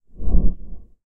Unknown Alien Breathing Through Machine
Can be used alien/monster breathing in lab or machines. Also useful for futuristic movies/ animations or bio machines.
Thank you for the effort.
oxygen, alien, breathing